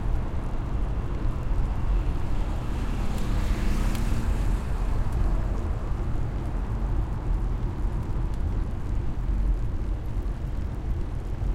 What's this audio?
SUBIDA A PATIOS Pedaleo-rodamiento en desacelere
Proyecto SIAS-UAN, trabjo relacionado a la bicicleta como objeto sonoro en contexto de paisaje. Subida y bajada a Patios Bogotá-La Calera. Registros realizados por: Jorge Mario Díaz Matajira, Juan Fernando Parra y Julio Ernesto Avellaneda el 9 de diciembre de 2019, con grabadores zoom H6
Bicicleta-sonora, bicycle-sounds, objeto-sonoro, paisaje-sonoro, patios-bogota, Proyecto-SIAS, soundscape